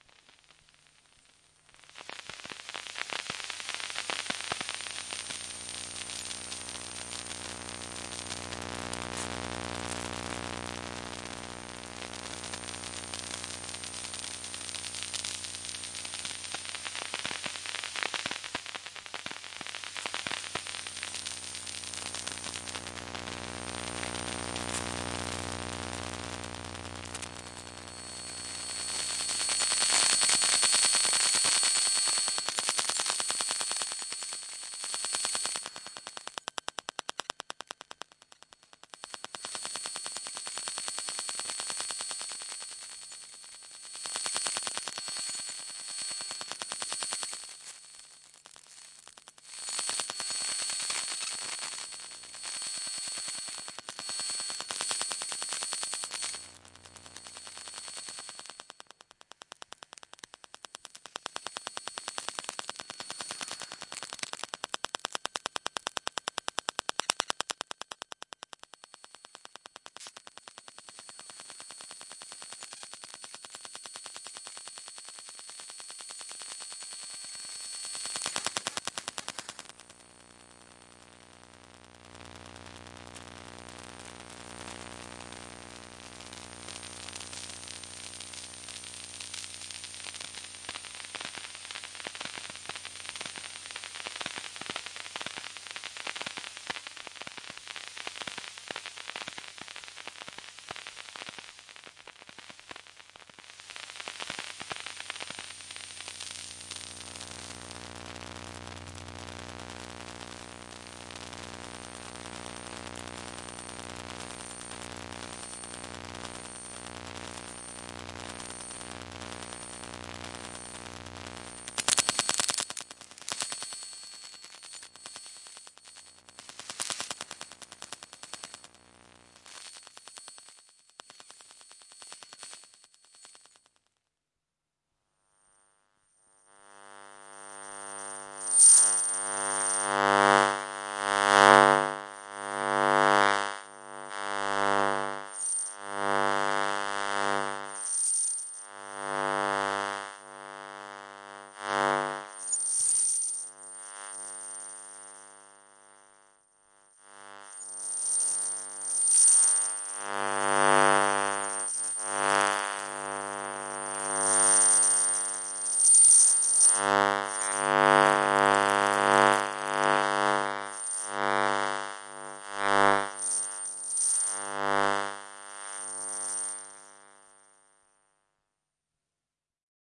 A soundwalk with an induction microphone looking for sweet spots in an apartment.
electromagnetism, hums, noises, soundwalk